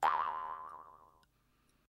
jaw harp7
Jaw harp sound
Recorded using an SM58, Tascam US-1641 and Logic Pro
funny, silly, bounce, boing, jaw, twang, harp